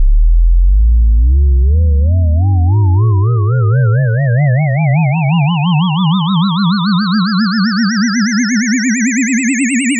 Generated with Cool Edit 96. Sounds like a ufo taking off.